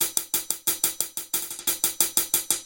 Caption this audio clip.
hi hat loop
hat, hi, loop